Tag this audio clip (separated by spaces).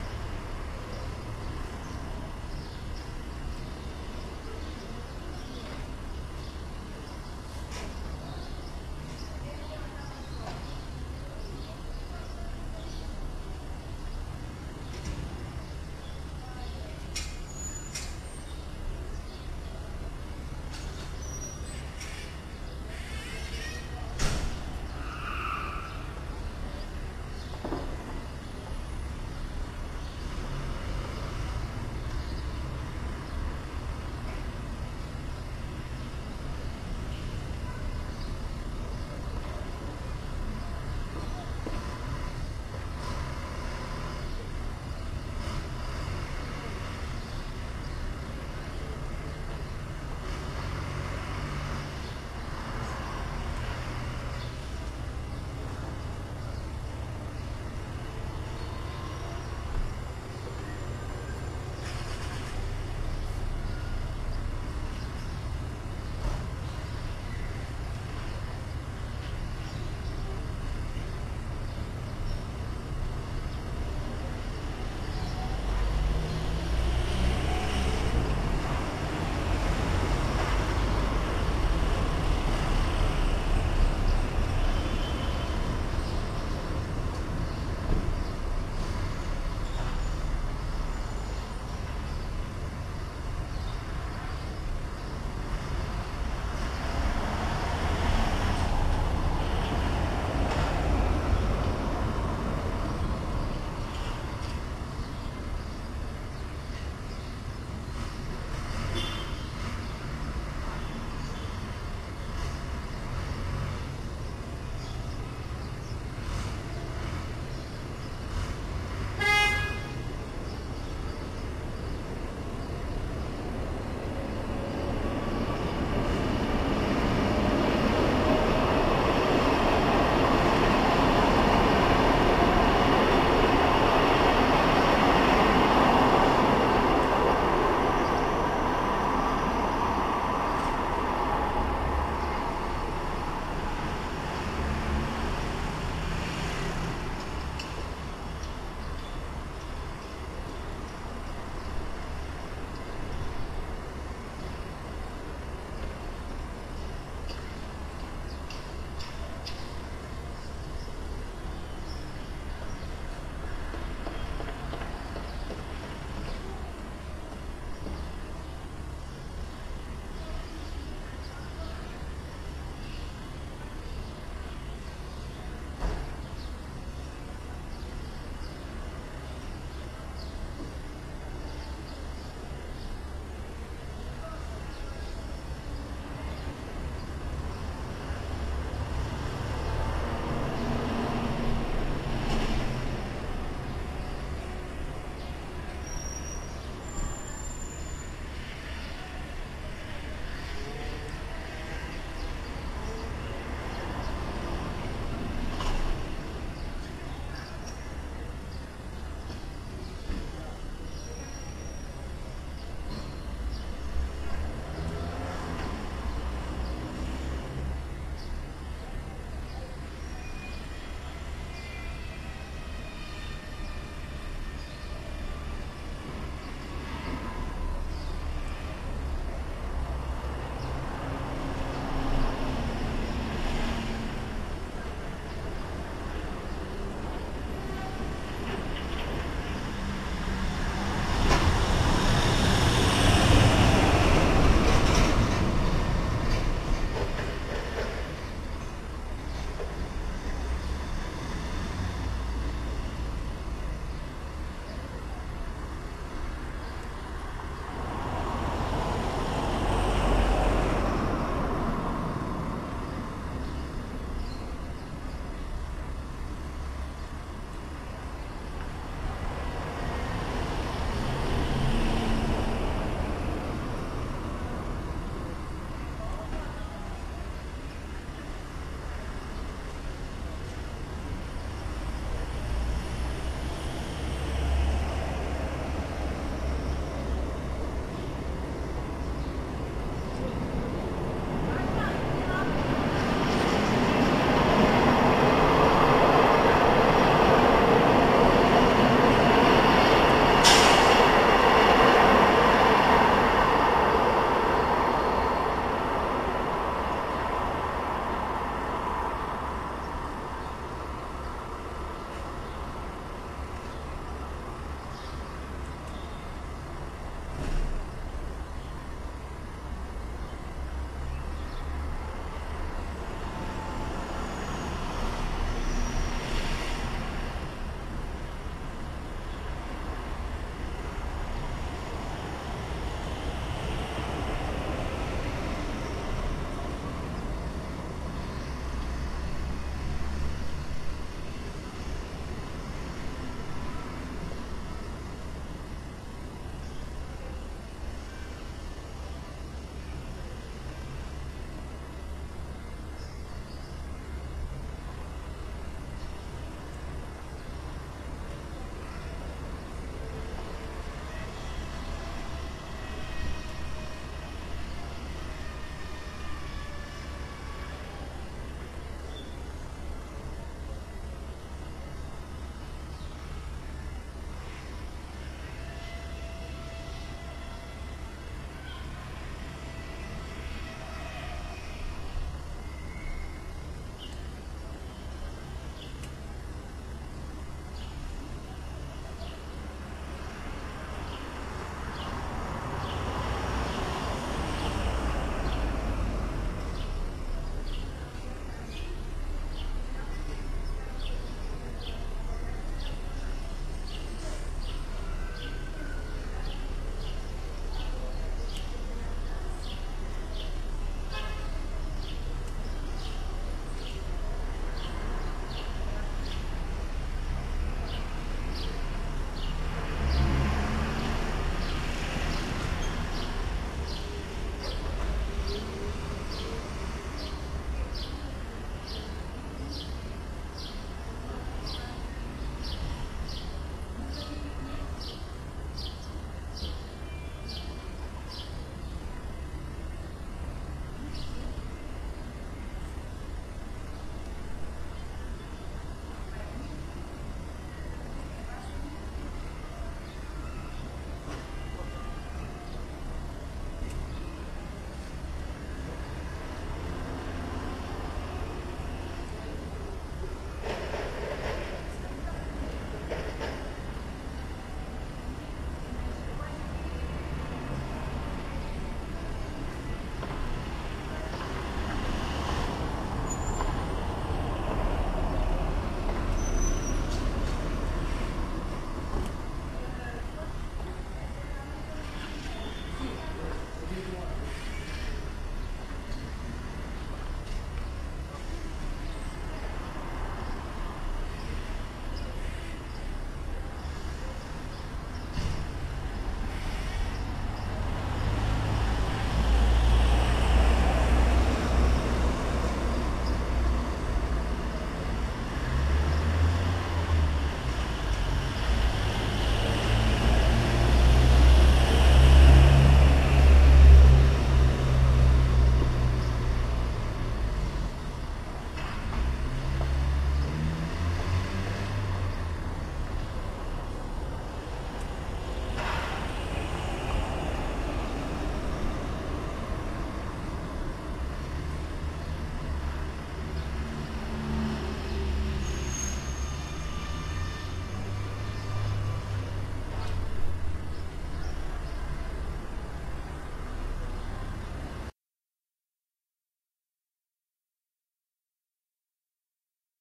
afternoon ambient cars city field-recording sparrows street trains urban